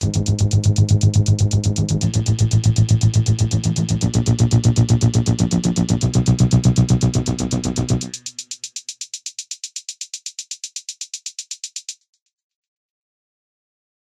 The sound of beating and ticking. Made to give some tension to your scenes.